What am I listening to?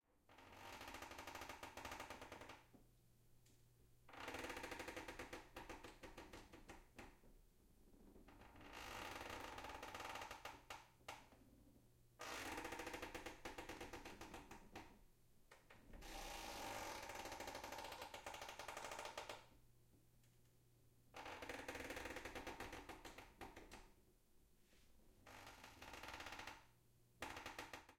Slow Creaking Stereo
Recording of a creaking chair. Good ambient character, a little noisy in the top end, ah well.